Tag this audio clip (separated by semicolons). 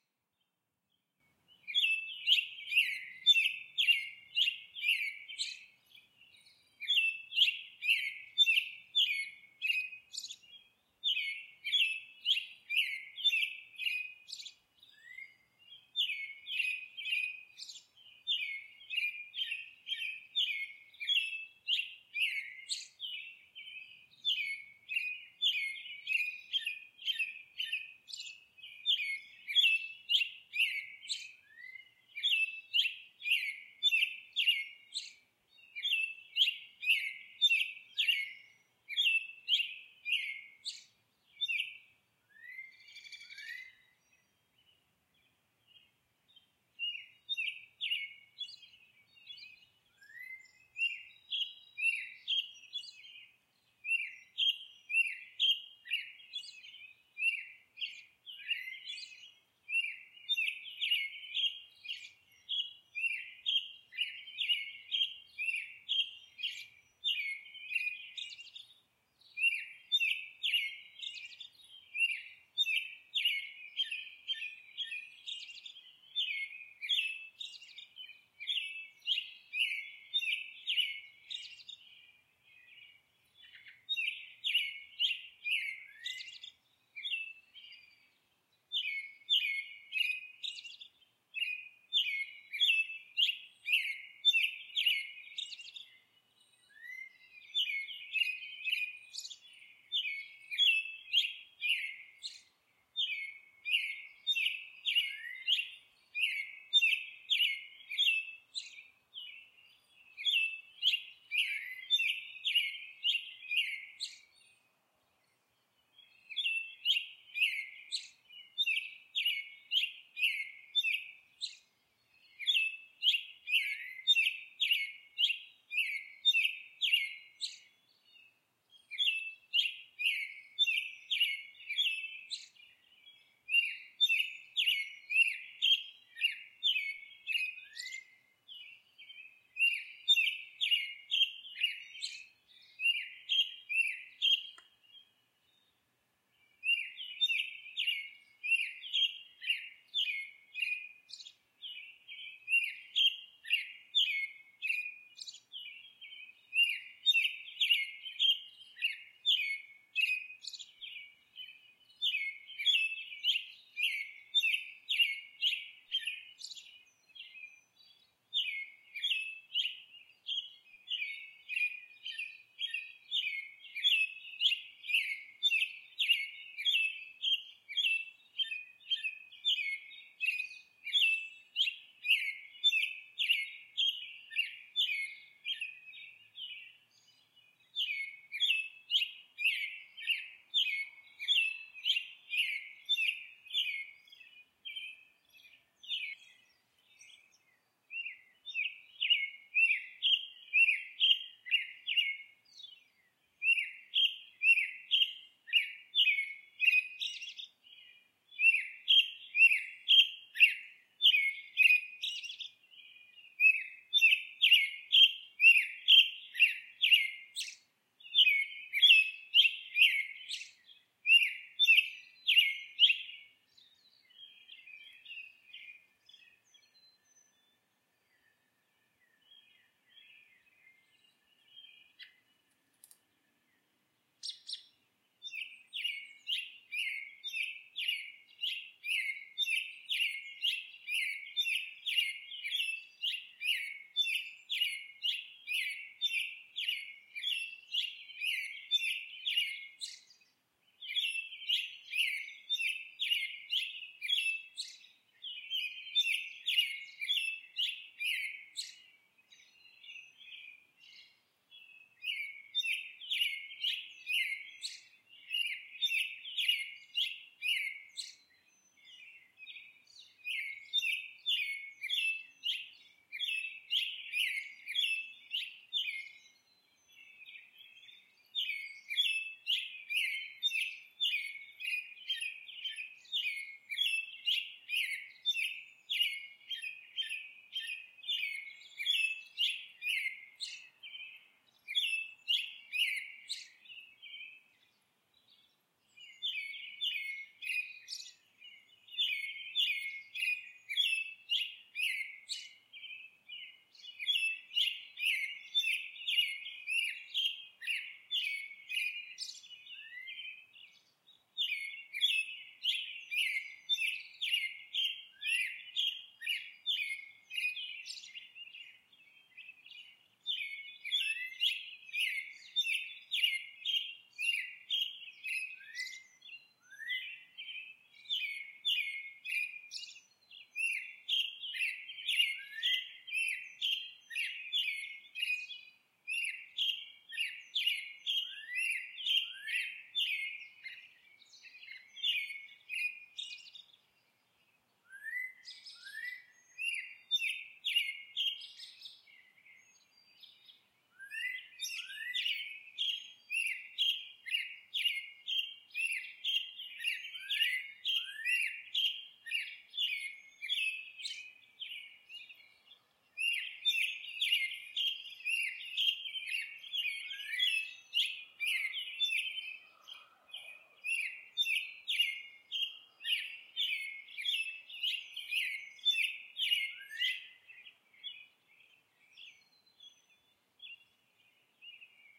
bird; bird-call; Bird-song; cardinal; spring-sounds